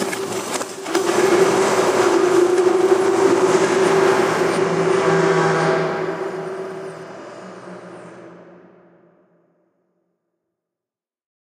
An old printer at work that was making a lot of noise, so I thought it would be cool to record it. I recorded the initial sound with an iPhone5, reversed it with FL Studio, and used the Fruity Convolver plugin to add the reverb.